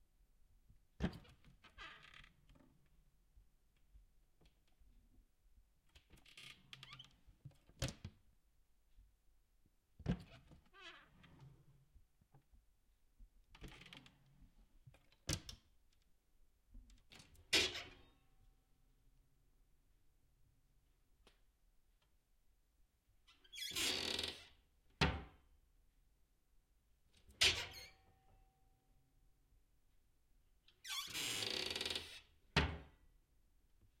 Door wood cupboard 1(glass)
Closing a cupboard door with glass.
cupboard, door, glass, wood